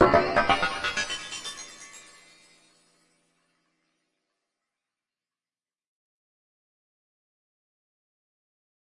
Sparkling Steroids 2
Tweaked percussion and cymbal sounds combined with synths and effects.
Sparkling, Abstract, Percussion, Rising, Effect